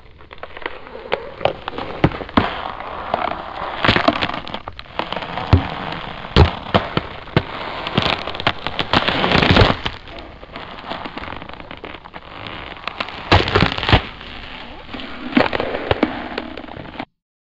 Ice 5 - Slow
Derived From a Wildtrack whilst recording some ambiences
field-recording, walk, footstep, ice, frozen, sound, step, winter, foot, freeze, frost, crack, effect, cold, snow, BREAK